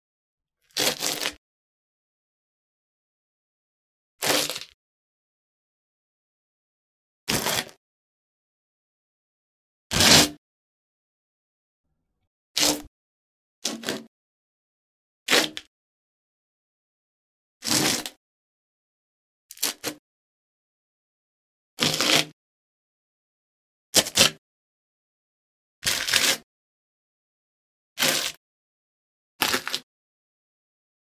Schritte - Schuhe kratzen auf Steinboden
Shoe soles scratching over stone floor. Moving feet without raising the shoes
steps, shoes, sole, field-recording, stone, floor, scratch